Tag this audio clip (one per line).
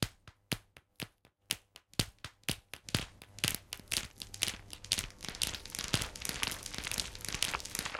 loop
processed